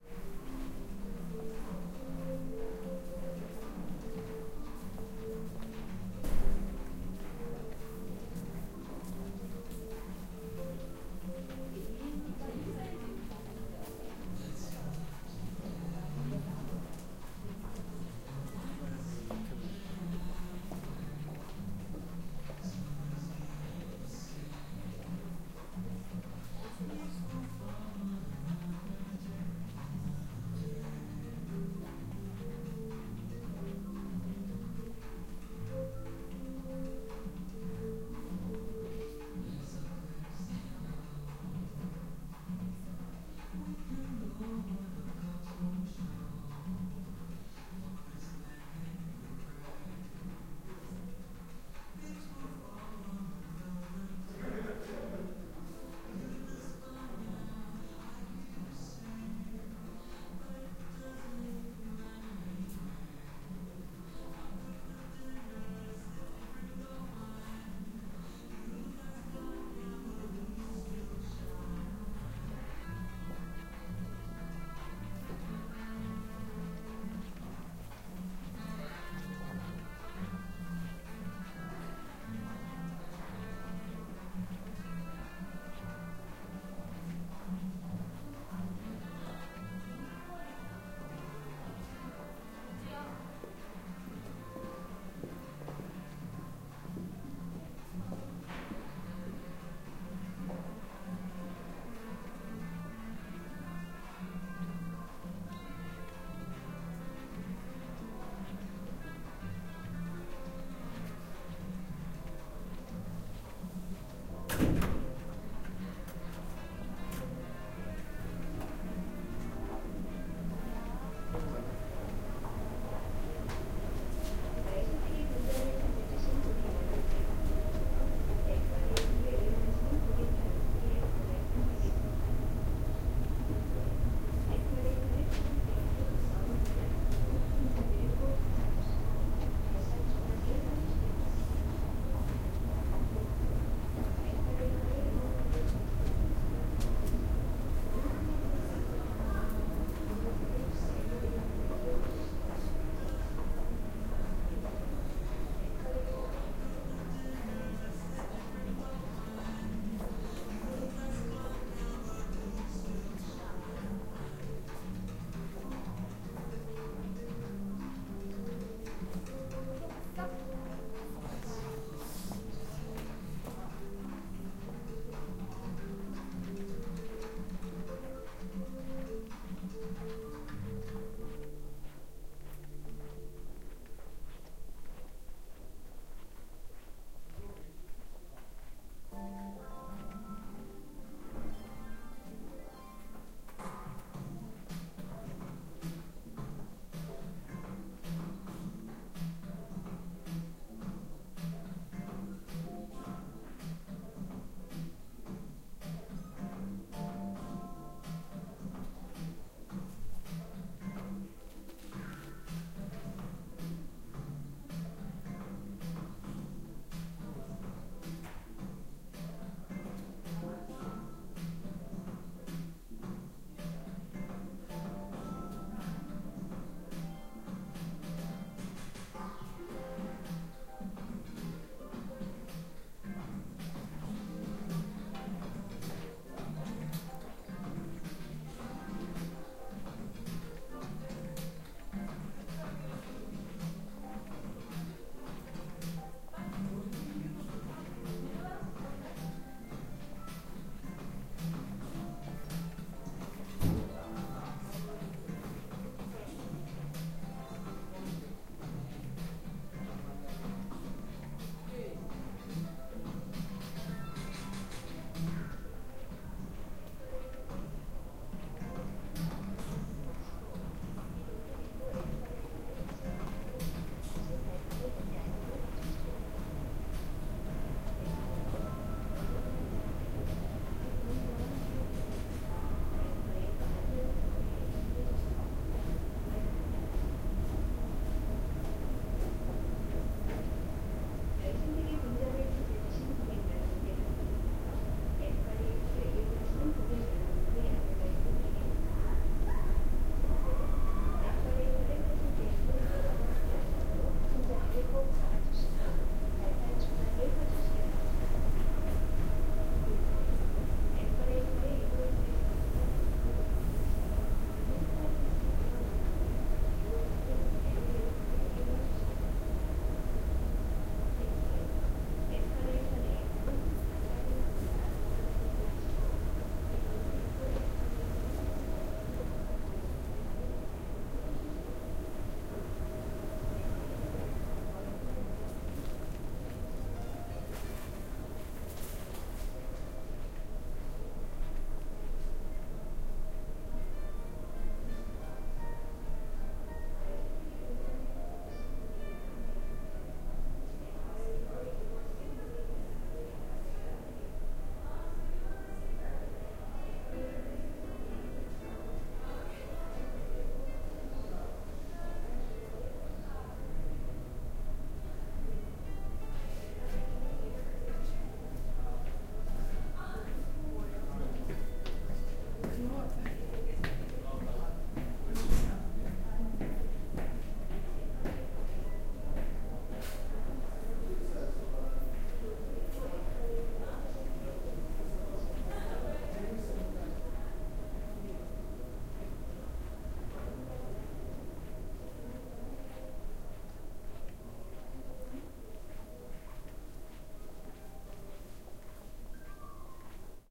Walking in a boutique inside of Shinsegae department store. Music and people talking in the background
20120122